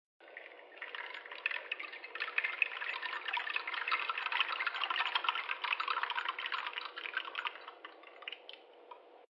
Recording I made of a rain stick